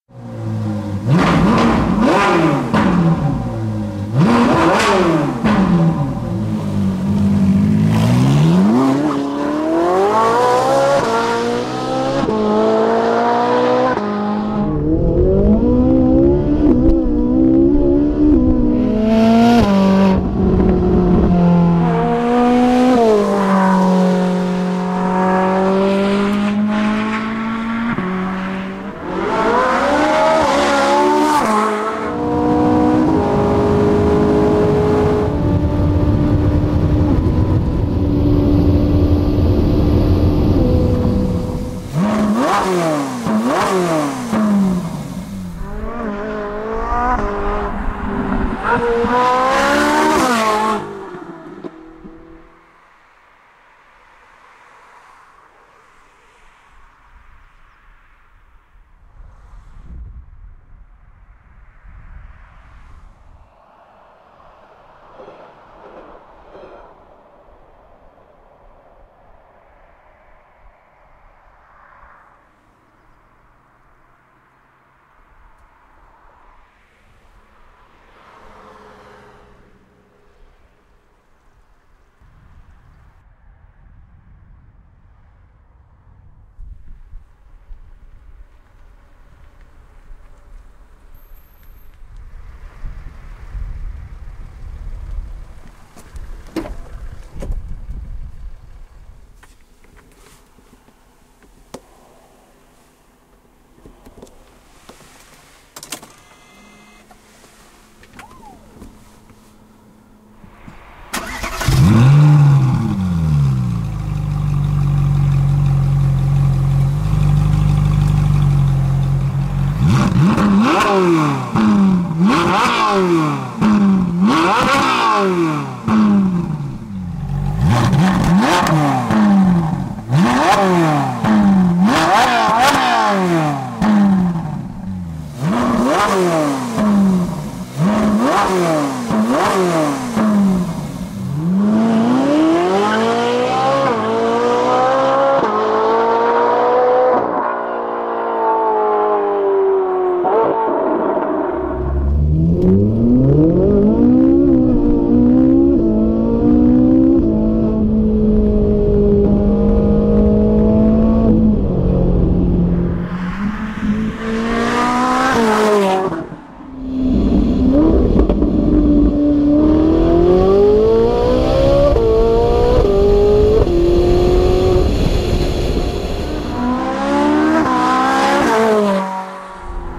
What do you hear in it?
Acceleration of Ferrari
Ferrari, engine, car